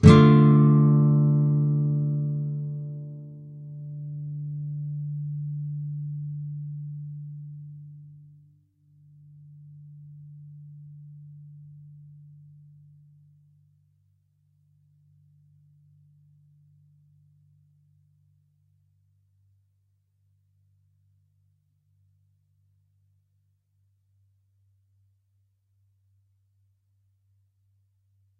Standard open D minor chord. Down strum. If any of these samples have any errors or faults, please tell me.